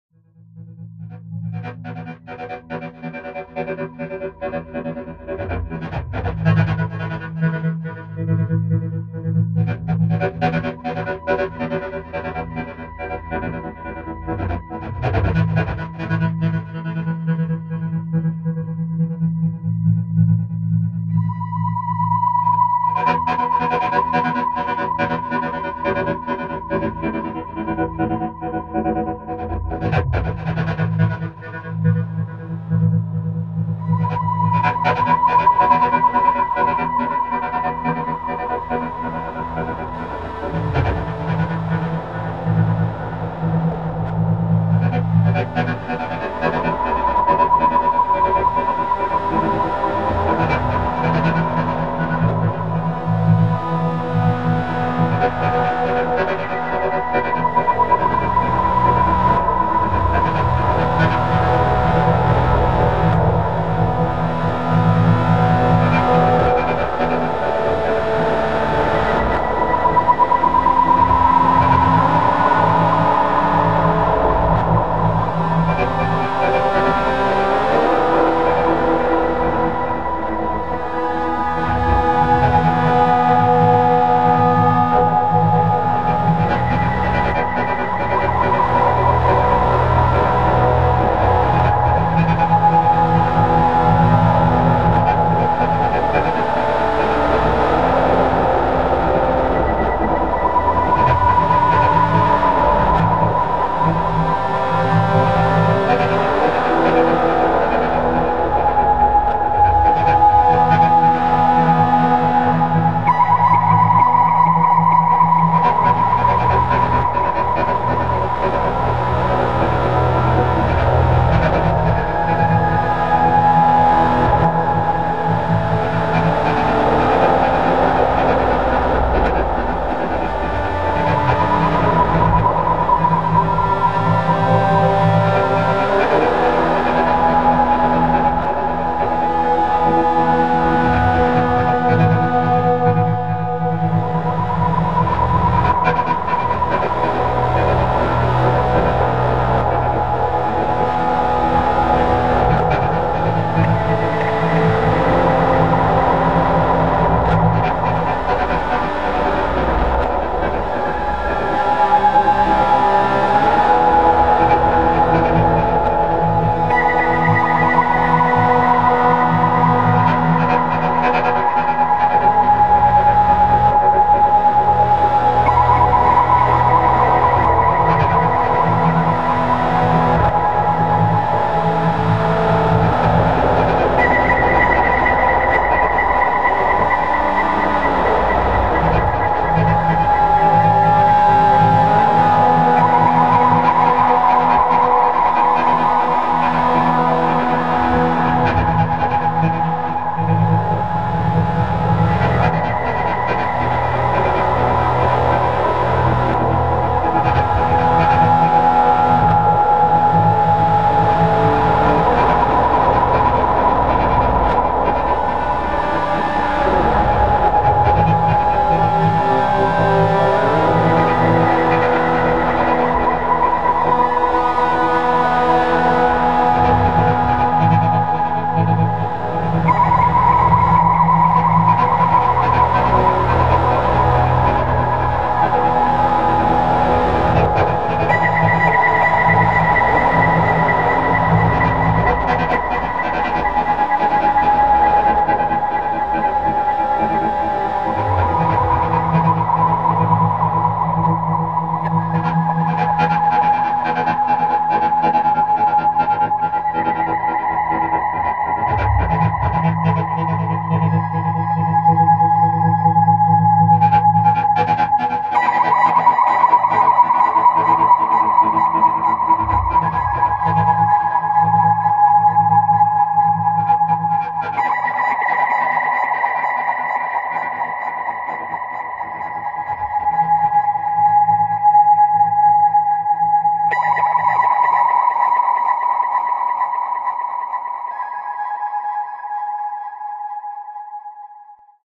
CWD LT Sferi collab
A layer from "Within Alien Ocean Biome" track, recorded by Sferi.
ambience, ambient, atmosphere, cosmos, dark, deep, drone, epic, fx, melancholic, pad, science-fiction, sci-fi, sfx, soundscape, space